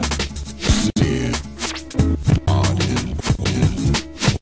92bpm QLD-SKQQL Scratchin Like The Koala - 021
record-scratch, turntablism